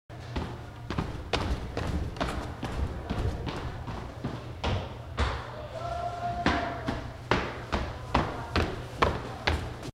walking up stairs